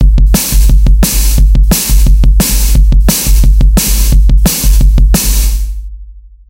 This is part of a dnb drums mini pack all drums have been processed and will suite different syles of this genre.